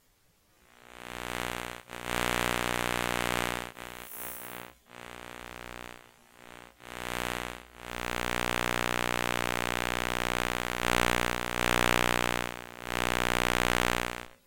Recording direct to drive and slightly processed with Cool Edit 96 using an old telephone pickup used for recording phone calls. When placed near a source of electromagnetic radiation it produces sound. Moving around my PC monitor.
interference, monitor, pickup